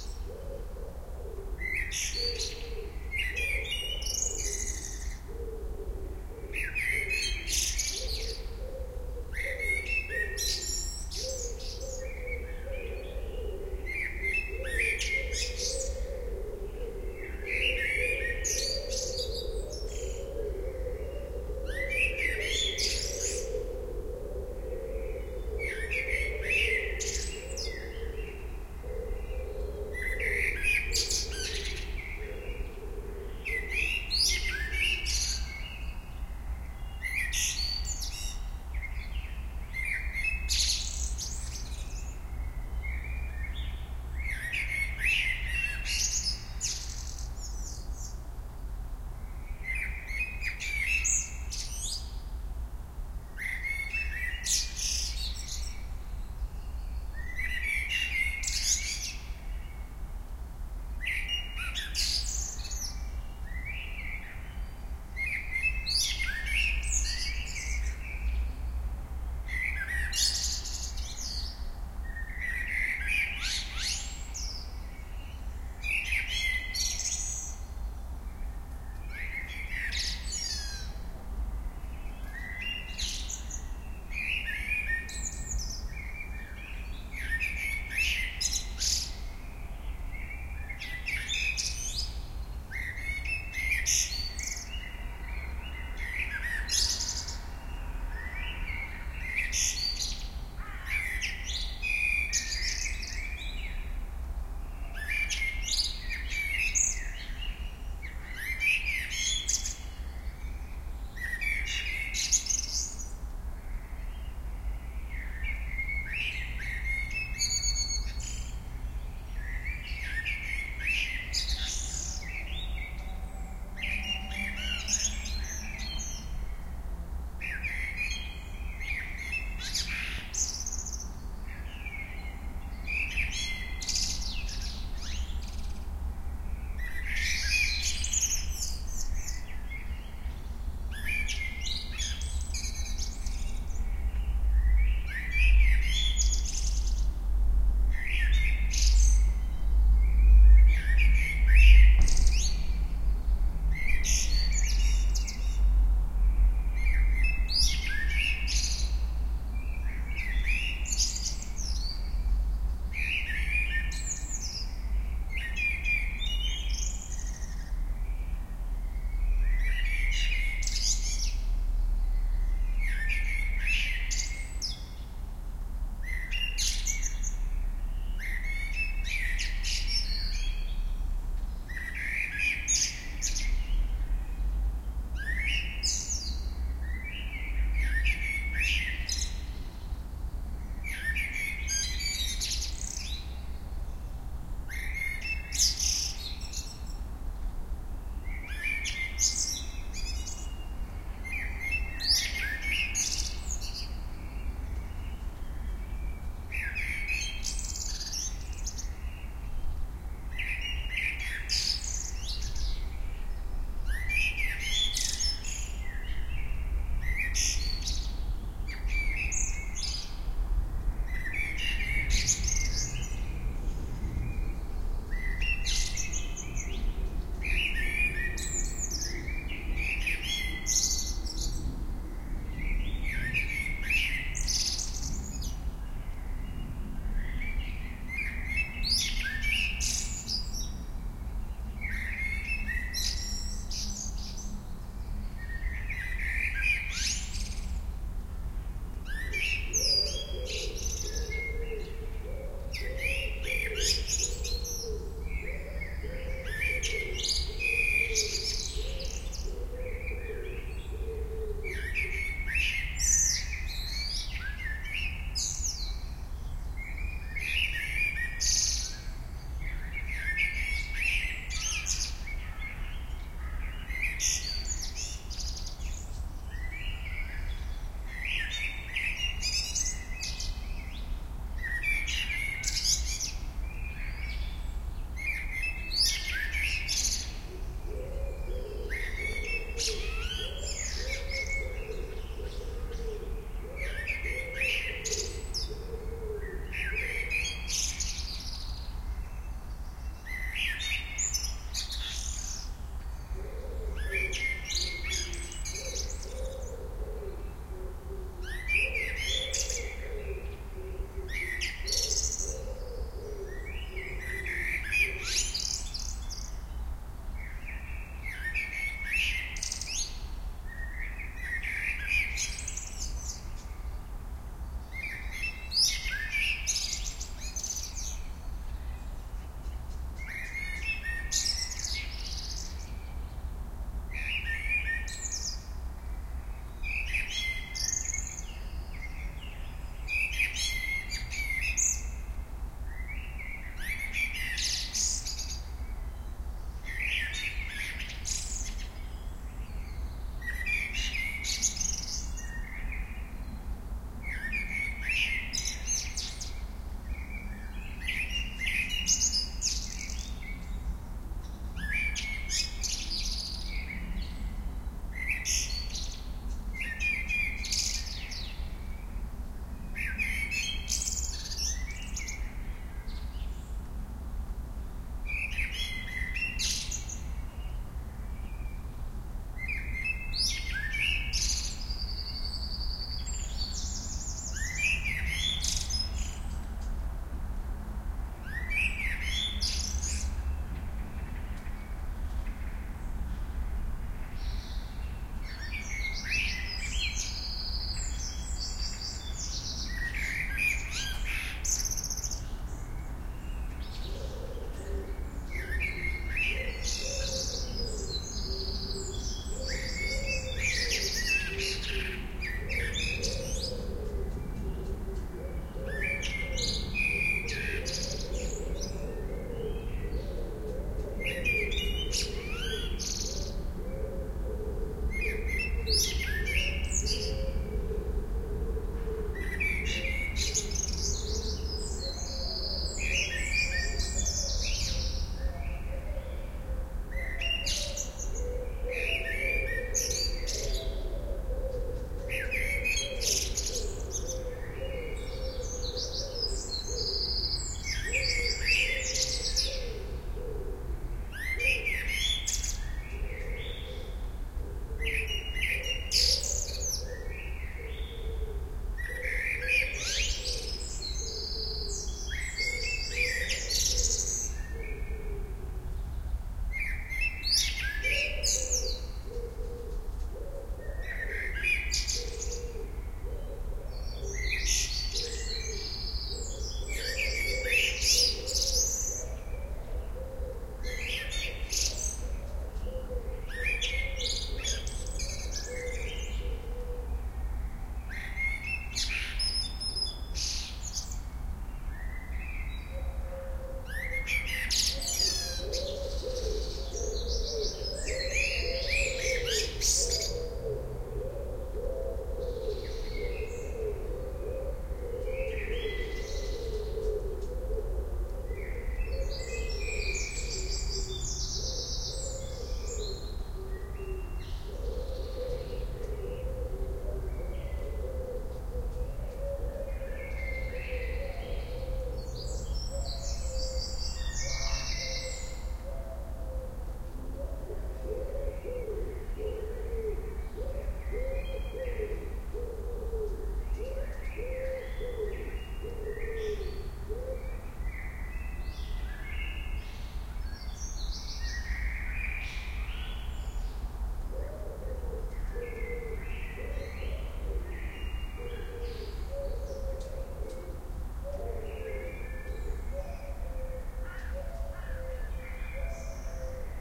Blackbird singing in the dead of night
It was nearly dark, when I made this recording. A pretty nice tune this blackbird was whistling. iRiver IHP-120 and Panasonic microphoncapsules.
bird, birdsong, field-recording